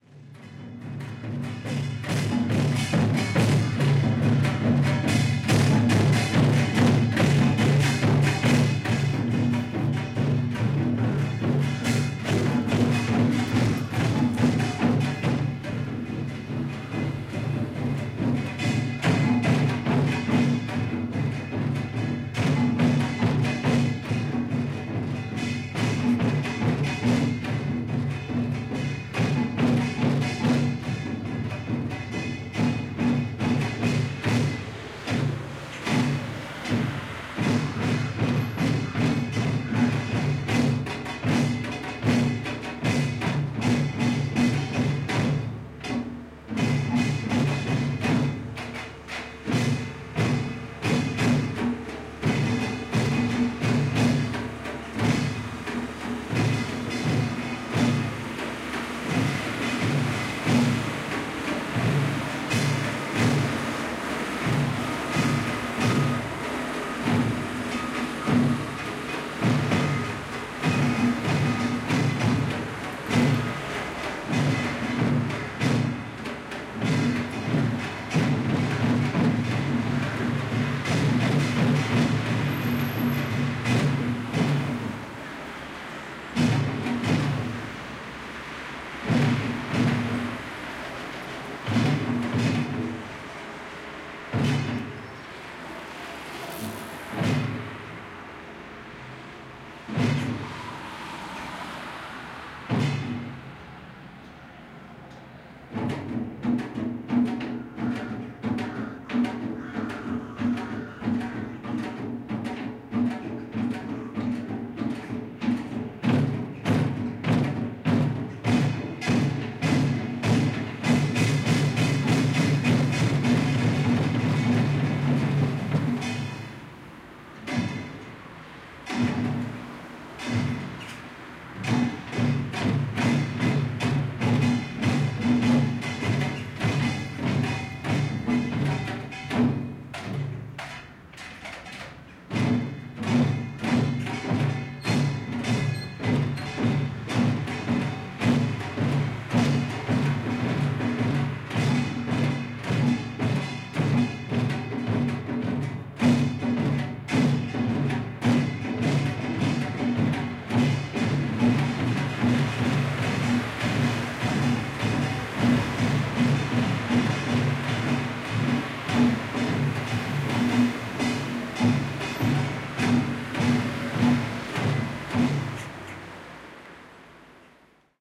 drumming workshop 201104
20.04.2011: about 19.30.Niepodleglosci Av. in the center of Poznan/Poland. drumming workshop in the University building. the sound was audible outside. I was recording in front of one of the windows.
poland, noise, beat, poznan, field-recording, workshops, drumming, street, drum